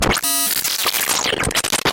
Freya a speak and math. Some hardware processing.
circuit-bent, glitch, speak-and-math